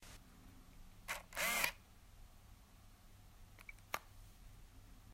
Camera Focus2
Focus, Camera, Sound, Lens